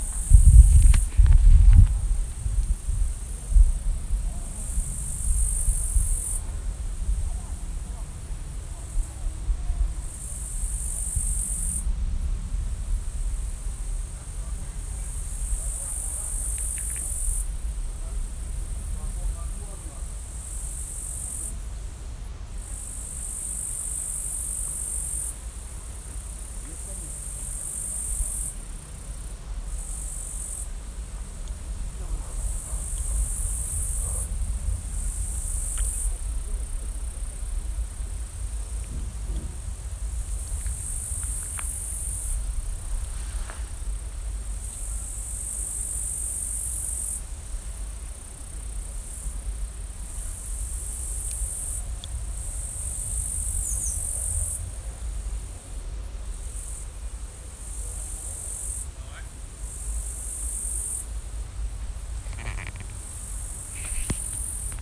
nature day sound 19.08.2018 17.27
I don't remember exactly where it was recorded, I think that somewhere in the country
ambiance, summer, nature, field-recording